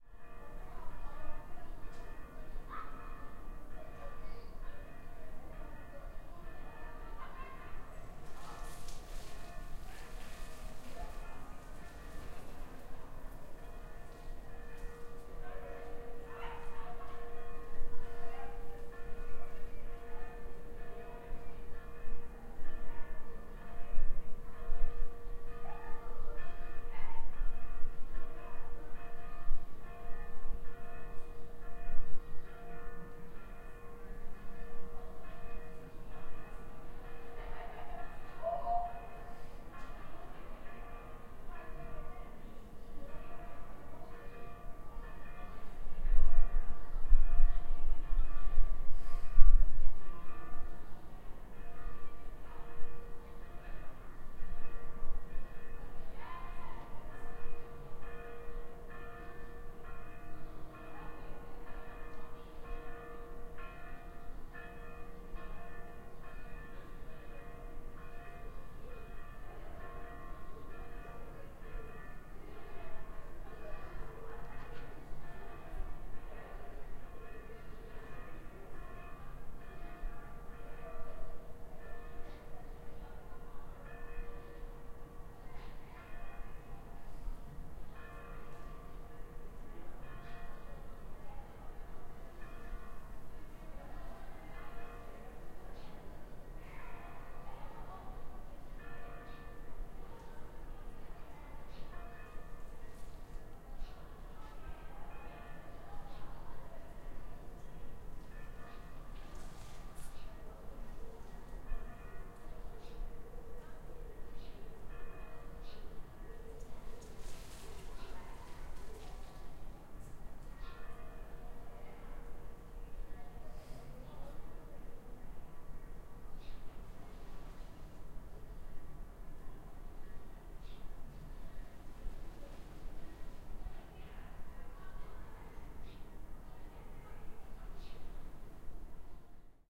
HSN 160713 distant spanish chappel bell
Distant sound of a church bell calling people; the bell sounds quite strange; children playing nearby; all taken from a patio in the town of Salamanca, Spain.
field-recording, church, chimes, chapel, bells, voices, children